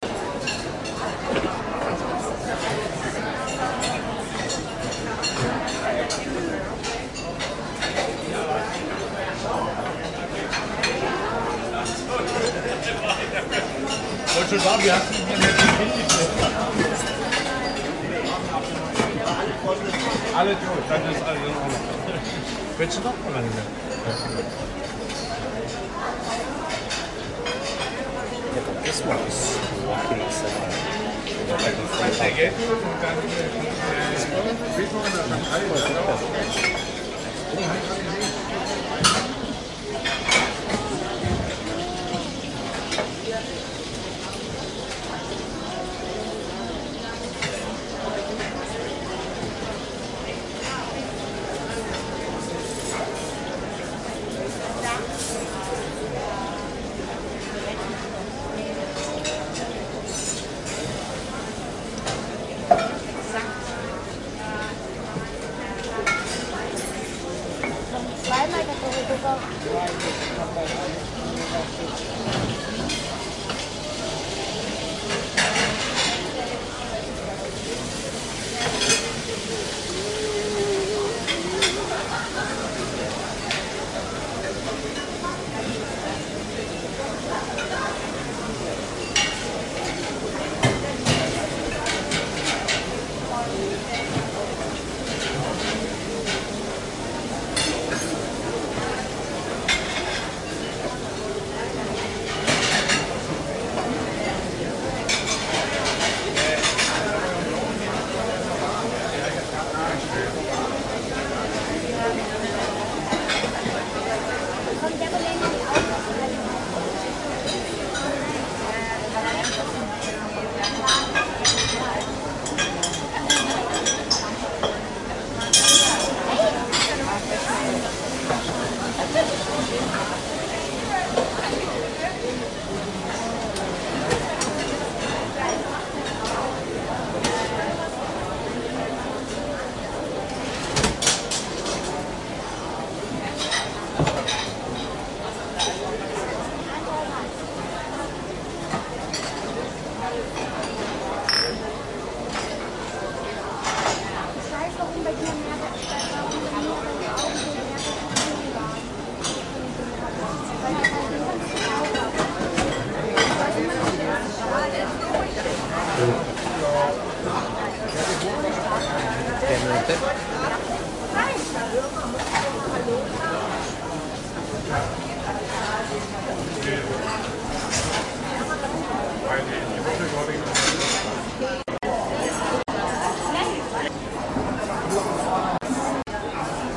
Food hall ambience recorded at the top floor of KaDeWe, Berlin.
kadewe, busy, berlin, restaurant, kitchen, foodhall